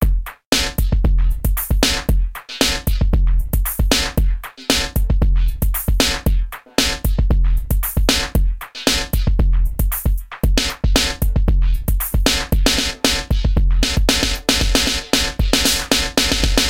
Funky drumloop with slightly resonating delay
115, drumloop, bpm, beat, electronic